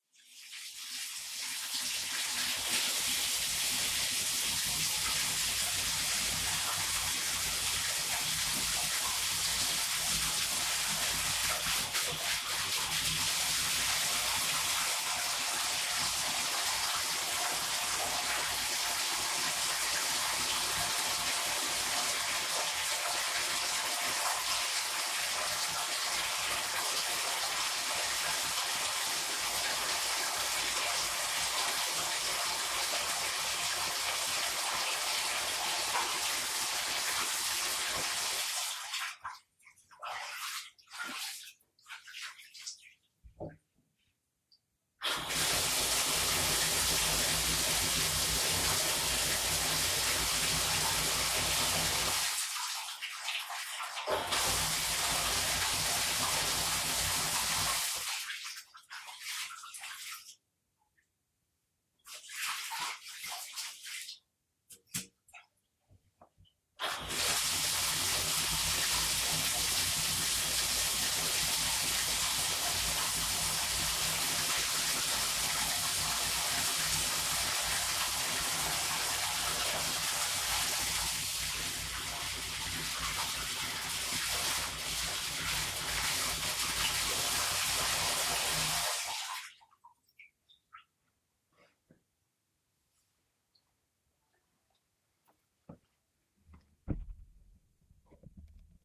I needed a sound of running water into a bathtub from outside a closed door. Recorded with a Zoom H2 while I ran the water into my bathtub. This sound was used in a production of "Boys Next Door"